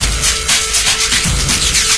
Thank you, enjoy
drums, beats, drum-loop